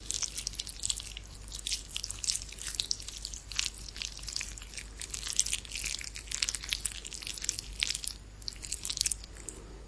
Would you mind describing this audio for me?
Chicken Meat Slime
Gutting a chicken. Disgusting.
Eww! Raw Chicken
Recorded with a Zoom H2. Edited with Audacity.
butcher, filet, dead-bird, gross, raw-meat, flesh